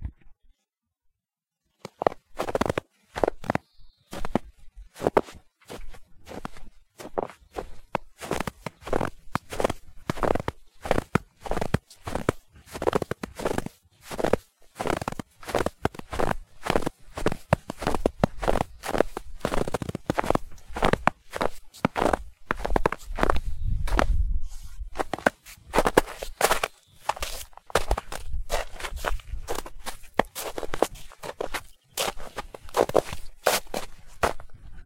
Multiple snow footsteps, some wind.